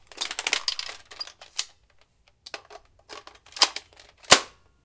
Nerf Sledgefire reload
A Nerf Sledgefire being reloaded. This includes the barrel breaking open, the empty clip bouncing in place, the new clip being inserted, and the action being closed back up.
The recording clipped a little bit at the end, but it's barely noticeable, and the higher gain makes it a lot nicer overall.
reload, nerf, gun, blaster